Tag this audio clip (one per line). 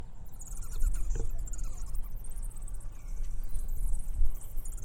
Nature
Asia